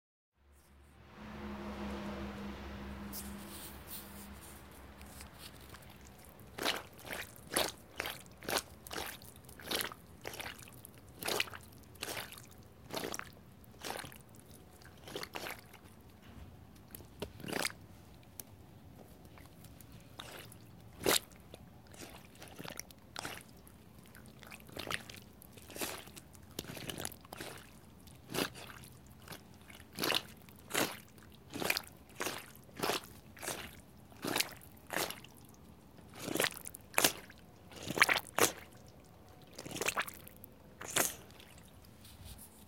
Stepping on Stone immersed in mud2
A stepping-stone in our garden was complete immersed in mud and made sluggy noises when stepping on it from different angles.